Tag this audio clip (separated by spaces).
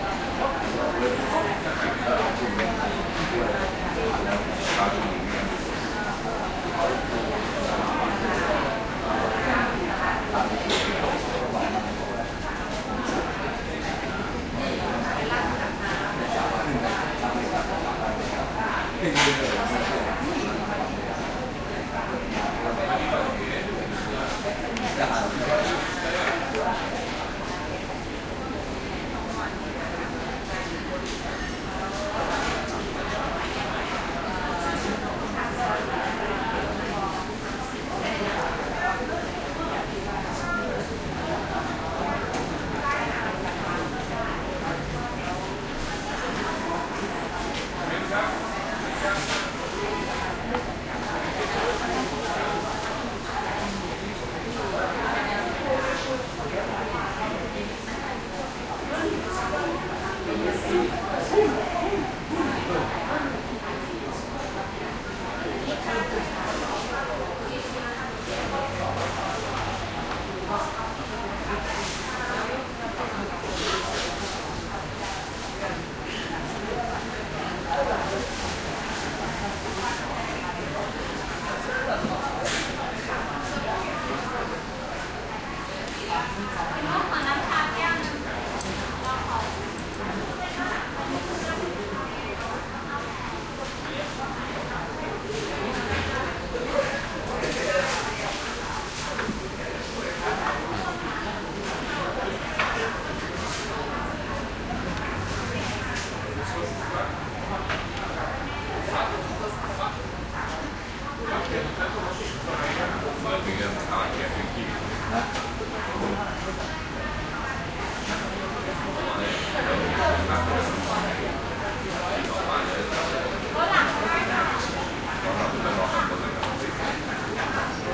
asian field-recording crowd busy Thailand bakery